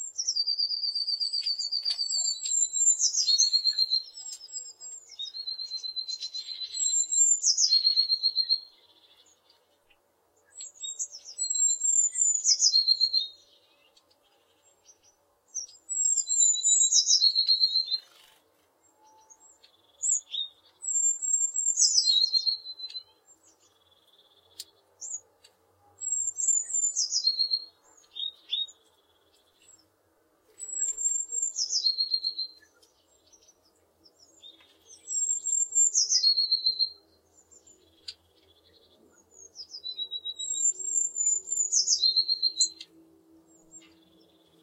A Bluetit are singig around the birdfeding in the winter.
Mikrophones 2 OM1(line-audio)
Wind protect Röde WS8
birdfeed, soundscape, tit, Tits, tweet, whistle, zoom